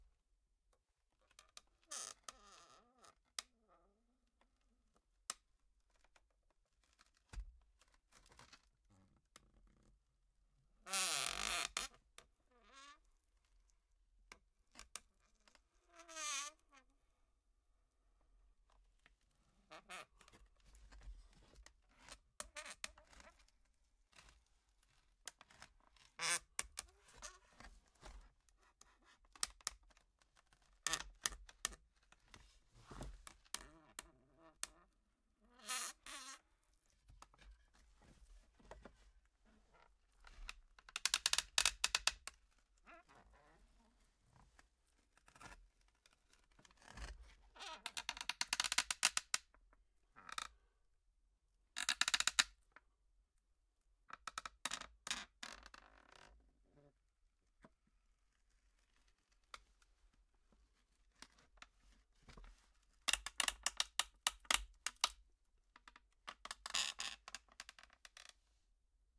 fly 5 boat crack 03
foley sound made with old wooden pieces nailed together, good for wood furniture or a boat
furniture, chair, squeaky, boat, wooden, creak, wood, cracking, sqie